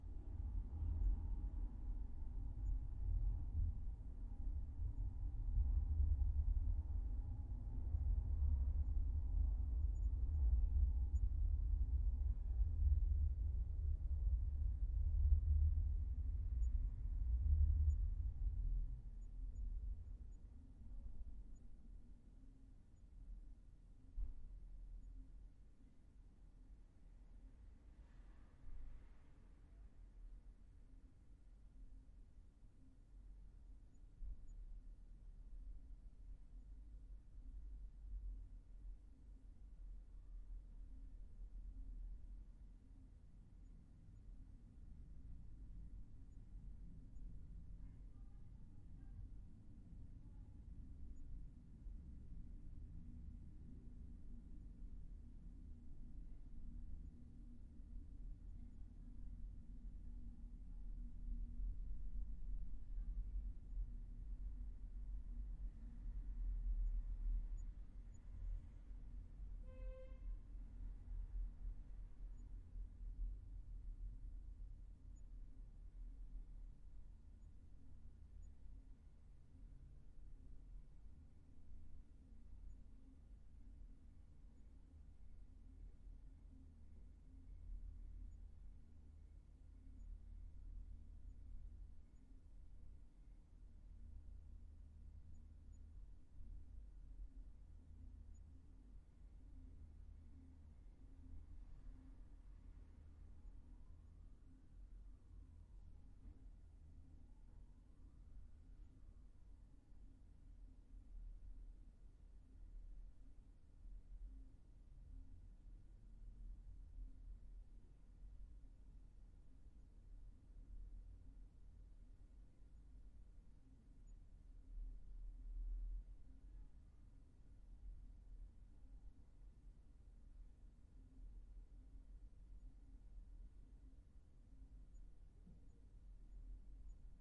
room tone house plane tram car neighborhood
room tone of my enter room, we hear plane, car and tramwat from the street